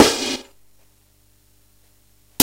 The dungeon drum set. Medieval Breaks